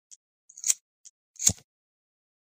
Encendedor (Click)
mechero, lighter, encendedor